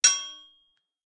The sound of what I imagine a hammer striking an anvil would probably make. This was created by hitting two knives together and resampling it for a lower pitch.